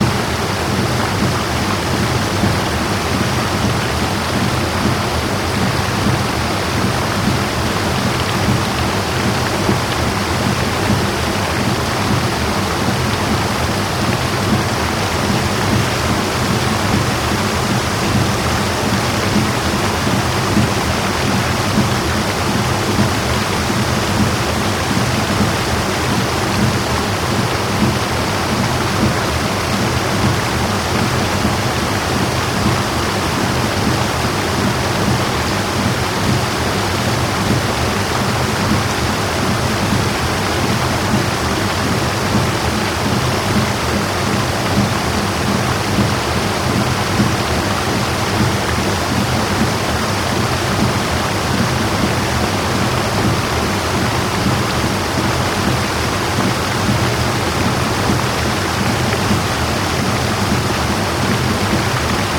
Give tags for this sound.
Mill waterfall Mechanism

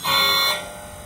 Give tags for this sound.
InterSpecies2018 PAR-lights Theatre

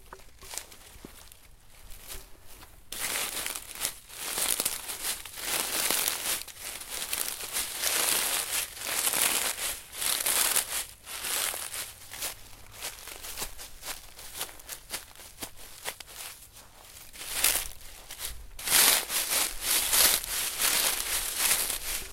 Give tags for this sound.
Snow foot footstep frost ice leaves running step walk winter

